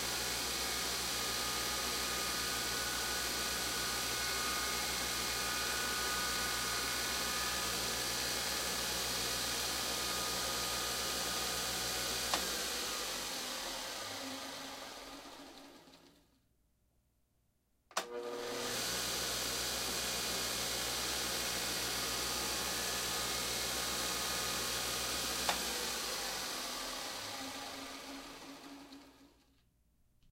just some motors funning
Compressor, engine, generator, machine, mechanical, motor, start